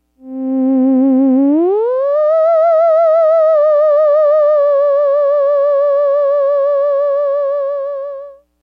scifi scare a
Mono. Dry. Classic theremin SciFi sound used to reveal the alien. Recorded dry so you can add the effects you wish.
scifi-sound-1, variation-1